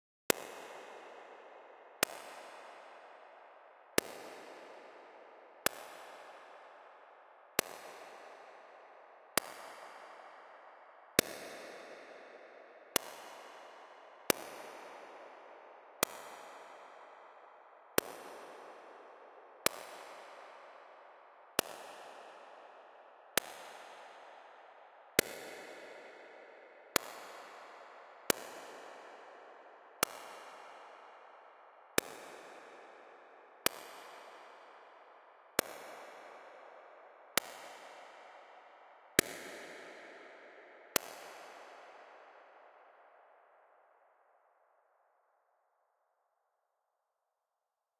GEIGER CAVE
deep, empty, science